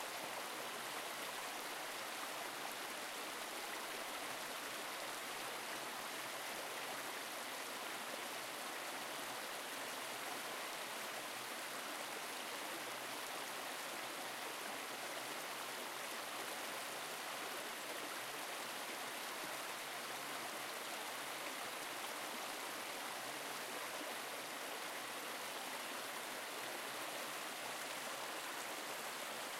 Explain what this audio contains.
Murmuring, babbling, burbling and brawling brook in the Black Forest, Germany. Zoom H4n
brook, creek, flowing, forest, water